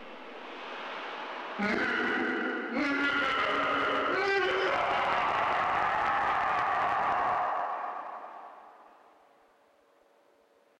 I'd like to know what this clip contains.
Manic evil laugh
Recorded under a long, dark, low bridge on my local canal. Me laughing. Slowed by 5% in Audacity. The result? -a chilling, maniacal, evil laugh.
Recorded late at night in fog - so it felt scary even to us. Recorded using a Sennheiser MKE300 'shotgun' mic.
It was saved... but nothing can save you! "Muh, muh, muh ha ha haaaaa!"
horror, manic, evil, chilling, laugh, echo, fear, scary